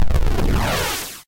A simple 8 bit transition sound
arcade, chiptune